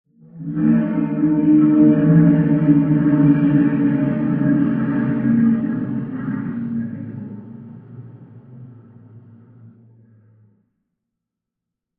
Sharktopus roar, close perspective (from outside of water).